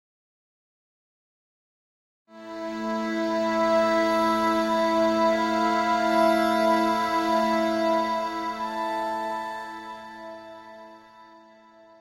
DEUS-EX-MACHINA-2-Tanya v
random, dream, surrealistic, cosmic, electronic, supernal, divine, public, ambient, domain